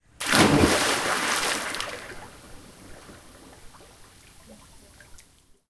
Splash, Jumping, G
Raw audio of someone jumping into a swimming pool. Browse the pack for more variations.
An example of how you might credit is by putting this in the description/credits:
The sound was recorded using a "H1 Zoom recorder" on 14th August 2016.
swimming, jumping, pool, splash, jump, splosh, splashing